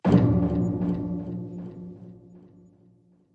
violoncello attack 4
violoncello processed sample remix
transformation, violoncello